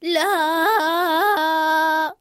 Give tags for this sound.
singing female voz voice femenina dumb cantando

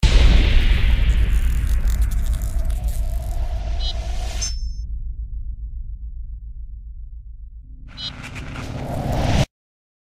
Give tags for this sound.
boom eletricfire firestring

